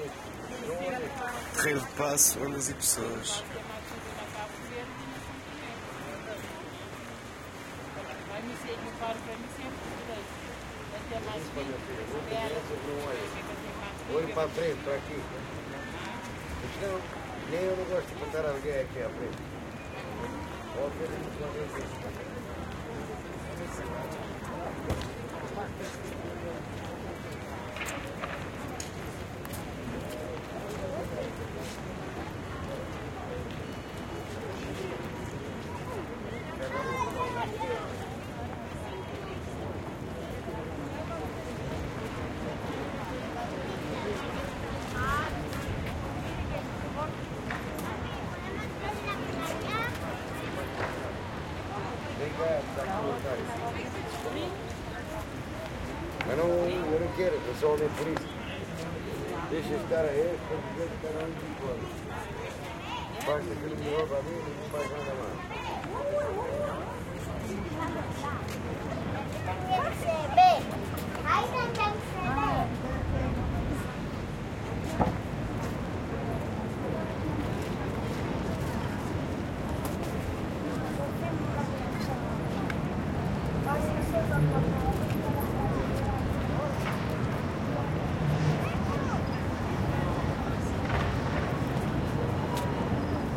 Lisbon Ambience near the river | Stereo Ms
ambiance, ambience, ambient, atmosphere, background, background-sound, city, field-recording, general-noise, Lisbon, river, soundscape